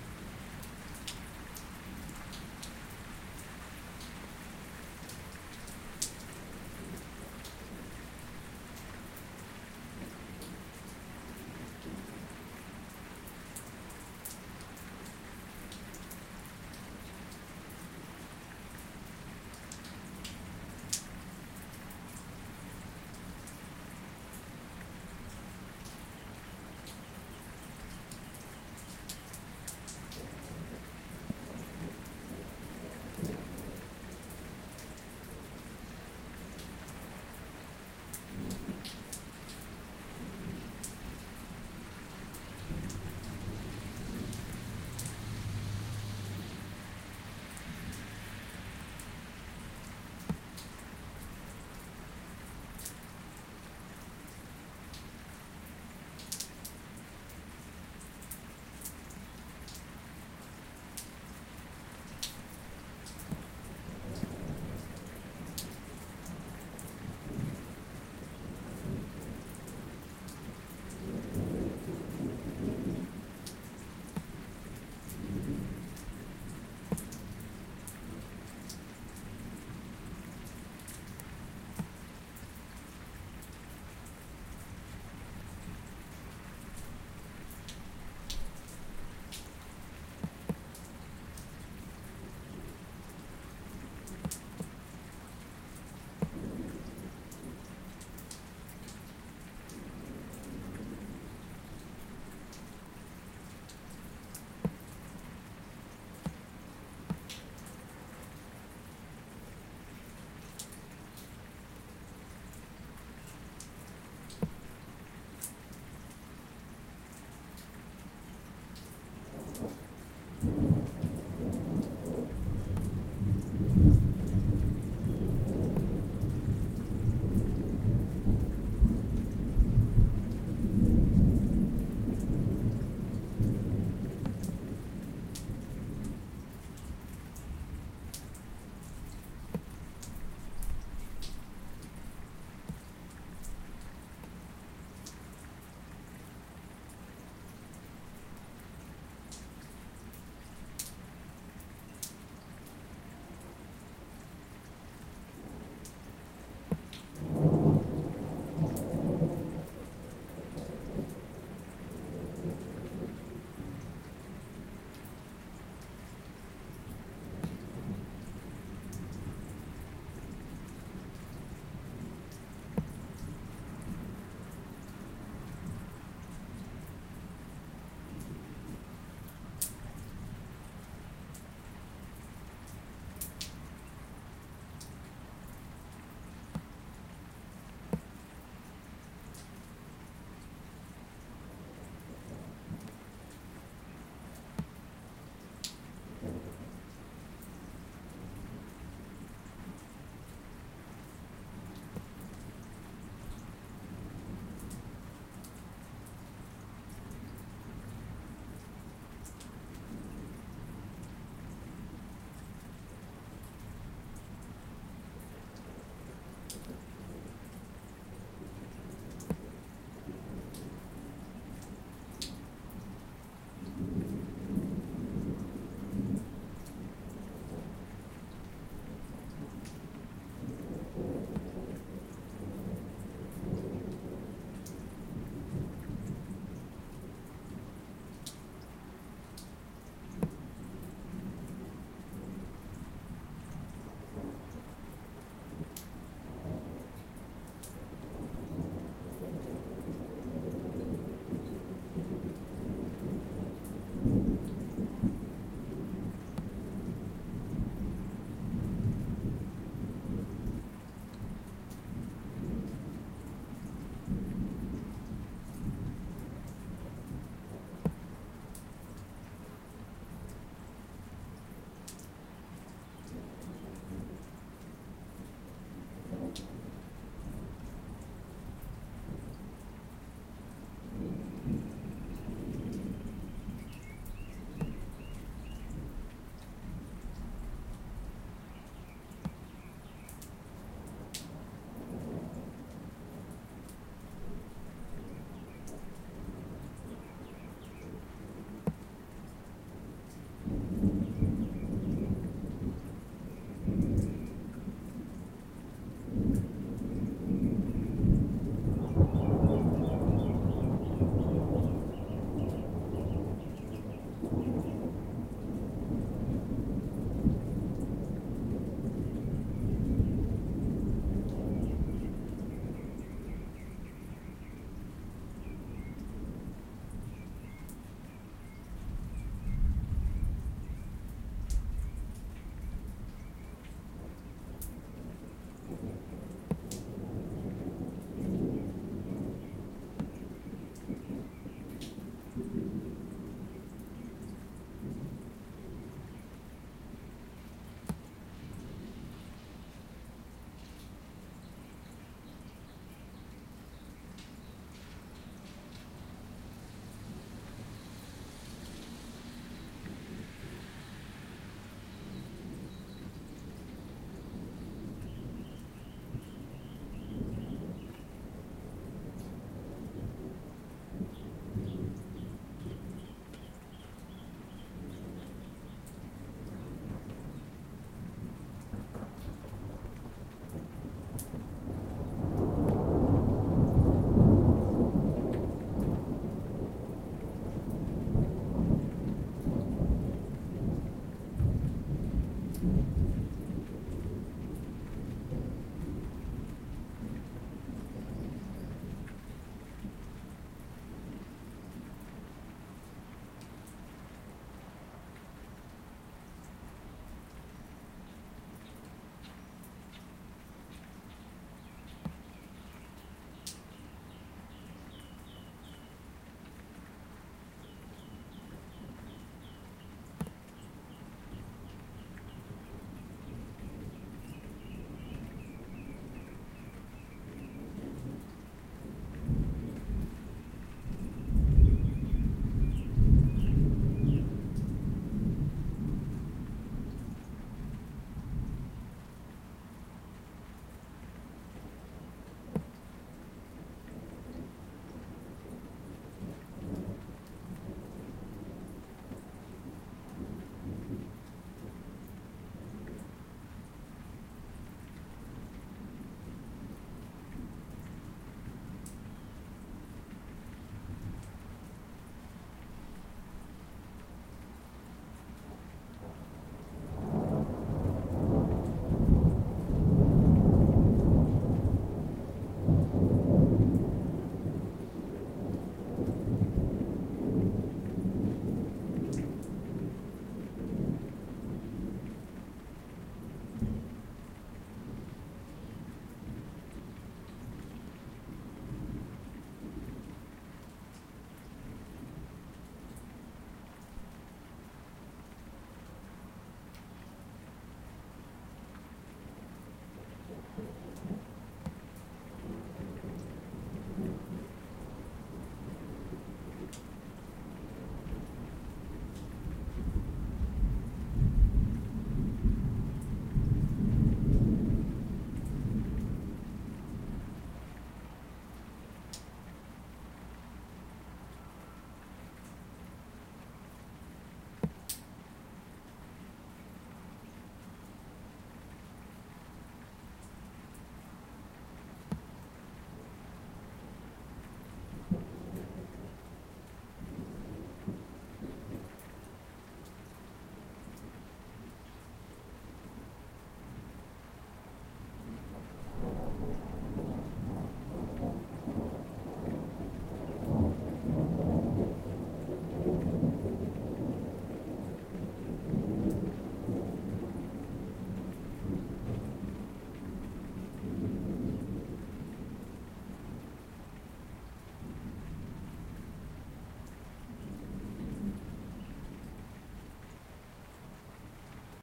Thunder storm recorded with USB mic direct to laptop, some have rain some don't.
field-recording, storm, thunder